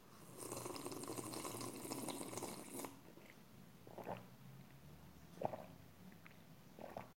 Slurping Coffee
A person slurping or drinking very hard on his coffee was recorded by using the Zoom H6 recorder with the XY Capsule on it.
Coffee, Drinking, Hot-Drink, OWI, Slurping